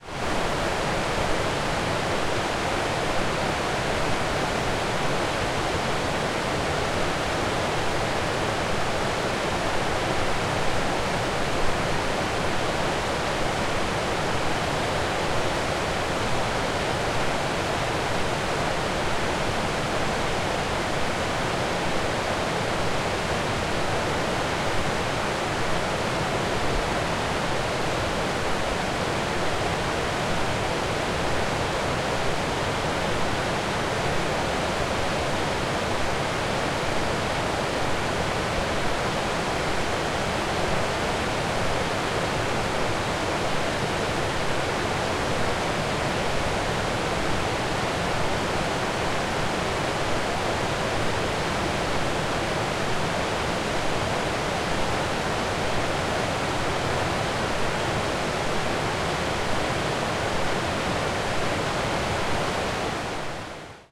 River Rapid Vinstrommen 1
Recording of Vinstrommen in the river Voxnan in Sweden with very high water level.
Equipment used: Zoom H4, internal mice.
Date: 15/08/2015
Location: Vinstrommen, Voxnan, Sweden
Rapid, River, Stream, Water, White-Water